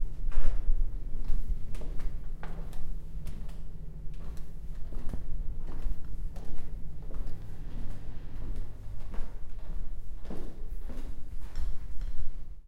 Hotel do Mar 2012-13 Stairs
Hotel do Mar,Sesimbra, Portugal 23-Aug-2012 06:53, recorded with a Zoom H1, internal mic with standard windscreen.
Indoors ambience recording
Descending 1 floor on an indoors curly suspended staircase wearing flip-flops.
hotel, indoors, footsetps